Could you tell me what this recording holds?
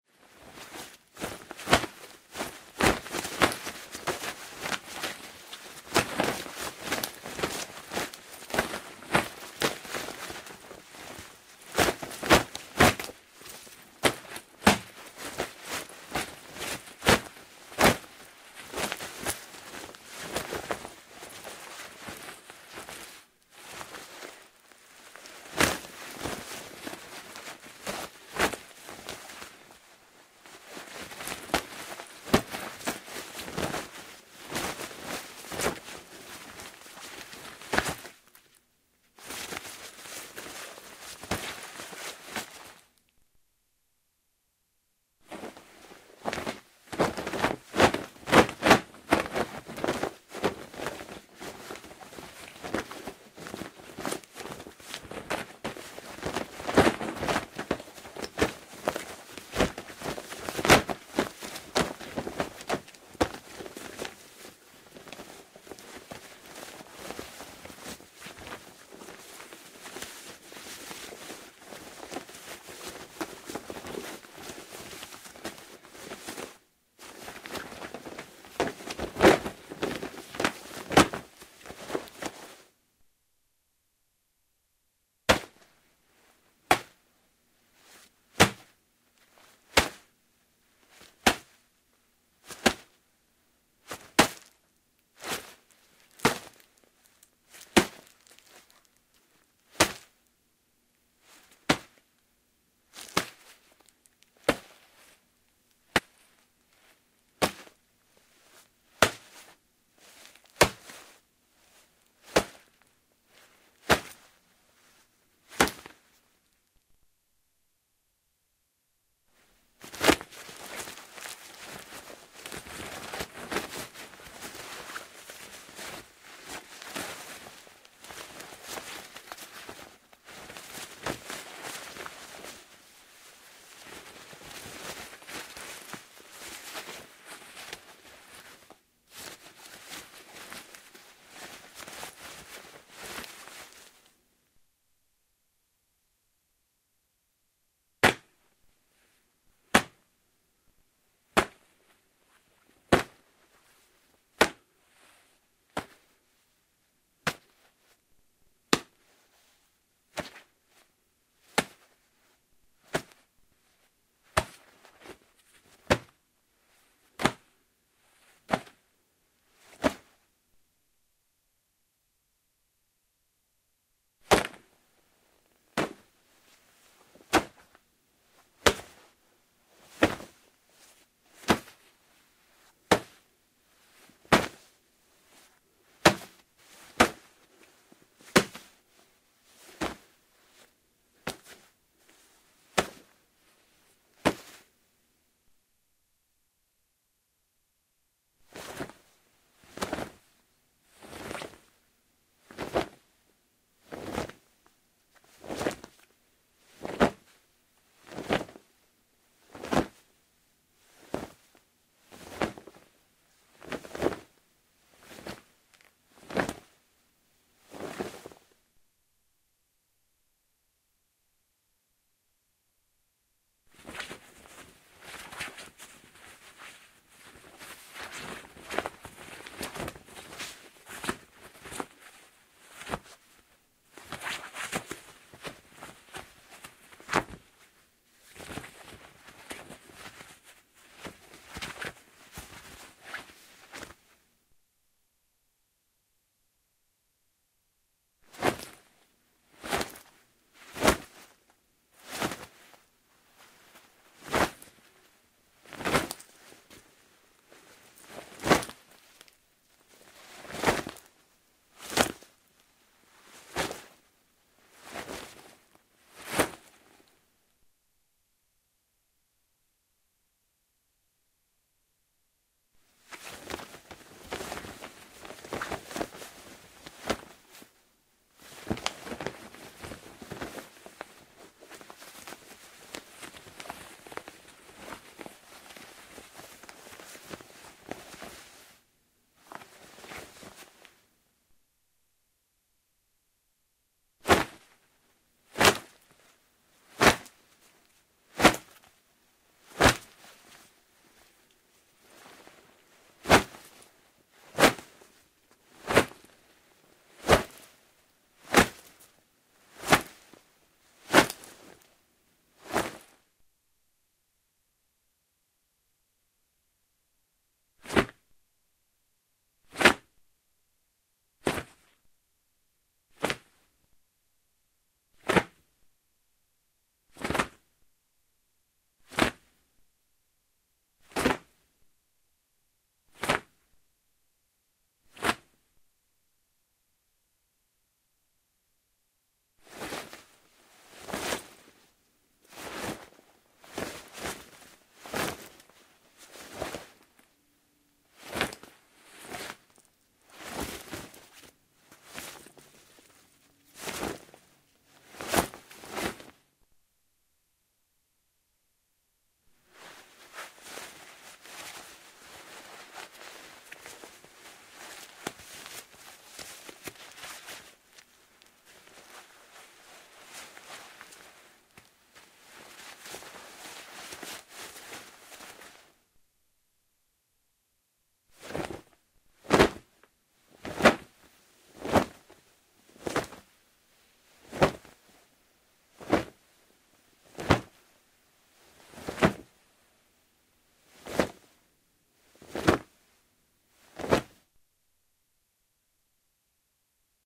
Foley Fight Moves Struggling
Foley, Fight Moves, Nylon Shorts, Sequence.